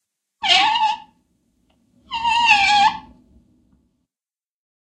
The Big Squeak (23) Screwing 5
Okay, about this small bibliothek there is a story to tell.
Maybe a year ago my mother phoned me and asked if I could give her a hand because the door to her kitchen was squeaking.
After work I went to her, went down to the cellar, took the can with the lubricating grease, went upstairs and made my mother happy.
Then I putted the grease back and went upstairs. Whe sat down, drank a cup of coffee. Then I had to go to the toilet and
noticed that the toilet door was squeaking too. So I went down to the cellar again and took once again the grease.
Now I thought, before I make the stairs again, I'll show if any other thing in my mothers house is squeaking.
It was terrible! I swear, never in my entire life I've been in a house where so many different things were squeaking so impassionated.
First off all I went back to my car and took my cheap dictaphone I use for work. And before I putted grease on those squeaking things I recorded them.
creak, creaky, screwing, slapstick-sounds, squeak, turning